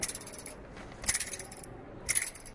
metallic loose high moving
Sounds recorded by participants of the April 2013 workshop at Les Corts secondary school, Barcelona. This is a foley workshop, where participants record, edit and apply sounds to silent animations.
Tiny metallic piece, loosely moving, high sound.
foley, high, lescorts, loose, metallic, moving, piece, tiny